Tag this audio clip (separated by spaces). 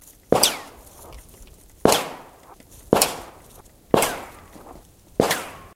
22 22lr bullet field-recording gun ricochet rifle shot